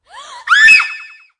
quick female scream
voice, yell, quick, scream, female, horror, Dare-16, should